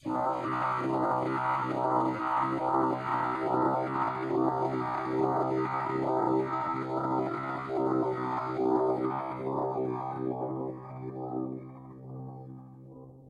generated using a speech synthesis program-- layering several vowel sounds and adding a low base noise in the same key. applied mid EQ boost, reverb and heavy phaser.
chorus, robot, voice, droning, choir, drone, ambient, sci-fi